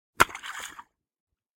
A splash. Not much more to describe. Not to great, but just something I recorded and decided to upload.
splash, water, sploosh